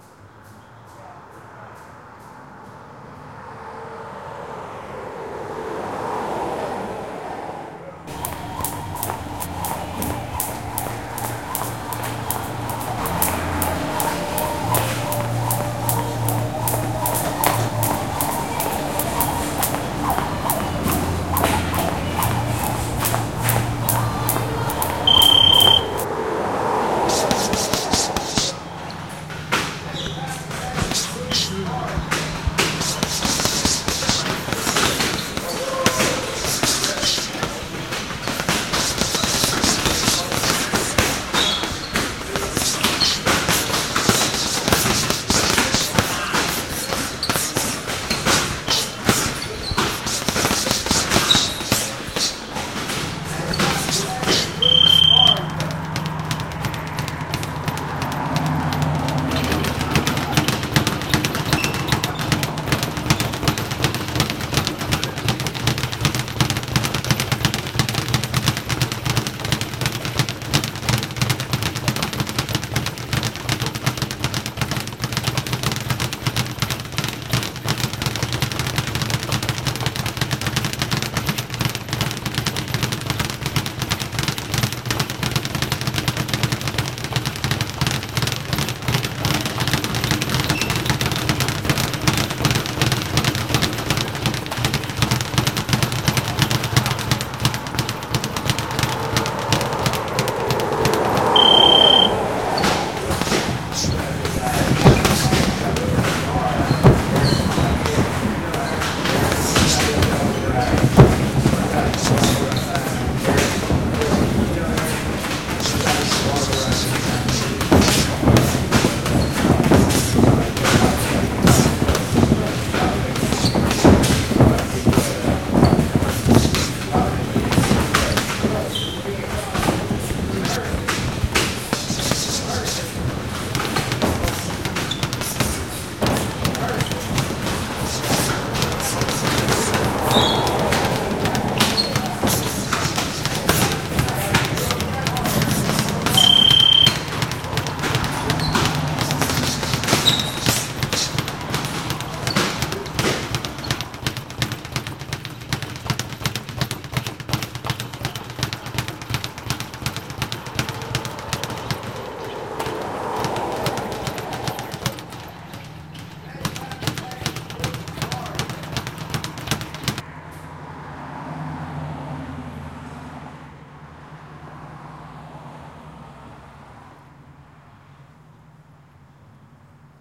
Boxing gym sounds: Speedbag, heavy bags, punching mitts, sparring, round timer bells, traffic passing open garage-bay doors. Recorded with a Zoom at a traditional boxing gym. Zoom recorder.